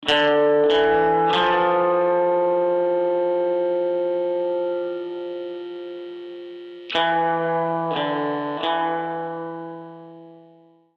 guitar clean electric